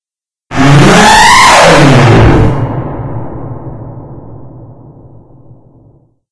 Space Elephant
This weird sound (see tags,) was made by holding a cheezy microphone to my nose and humming. I then added reverb to it. And if you think it's easy to name these things, it's not! (See tags.) Made with Audacity.
abrupt, alarm, confusing, hard-to-describe, loud, reverb, sci-fi, strange, weird